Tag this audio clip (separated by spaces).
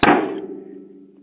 bass drum kick lo-fi